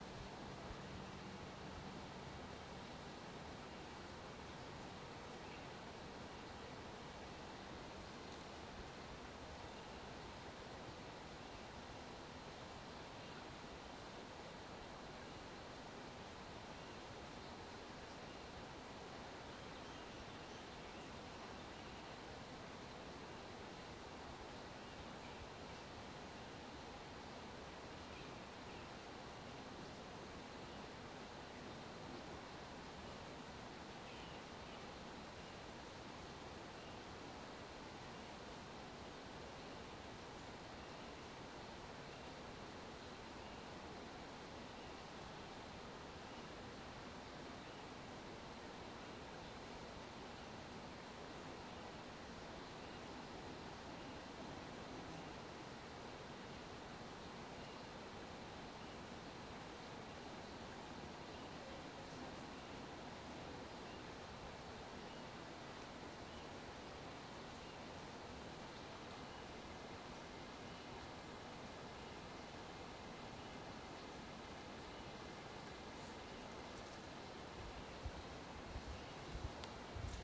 Recording from a forest. No process applied.
ambient, wind, trees, birds, Forest